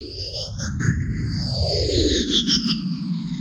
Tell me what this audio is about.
FTZ GC 110 SS11
Sounds of bigger and smaller spaceships and other sounds very common in airless Space.
How I made them:
Rubbing different things on different surfaces in front of 2 x AKG S1000, then processing them with the free Kjearhus plugins and some guitaramp simulators.
Hyperdrive, Outer